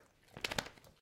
This is of a dog shaking their head and the sounds that their ears make when they shake.